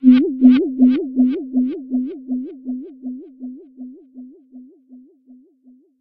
Pulsar from deep space. Ambient oneshot made with Alien Artifact VST synth. No additional filters used. I experimented with the vst's alien keypads and green spheres until I got a random result which sounded interesting imo. This sci-fi sample can be used in music, movies and games.
alien-artifact, sci-fi, oneshot, vst, ambient, space, alien, synth